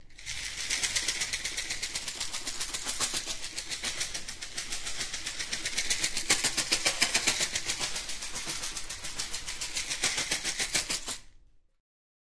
Can of cat food as an shaker
Information about the recording and equipment:
-Location: Living room.
-Type of acoustic environment: Small, diffuse, moderately reflective.
-Distance from sound source to microphones: Variable 0.3m to 0.6m.
-Miking technique: Jecklin disk.
-Microphones: 2 Brüel & Kjaer type 4190 capsules with type 2669L head amplifier.
-Microphone preamps: Modified Brüel & Kjaer type 5935L.
-ADC: Echo Audiofire 4. (line inputs 3 & 4).
-Recorder: Echo Audiofire 4 and Dell D630C running Samplitude 10.
No eq, no reverb, no compression, no fx.